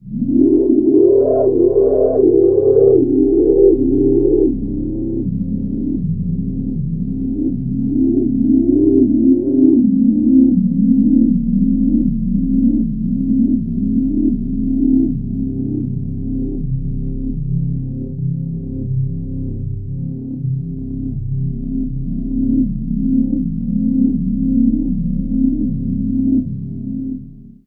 A slow, pulsing analog synth drone.
analog, drone, filter, fx, korg, polysix, pulse, slow, sweep, synth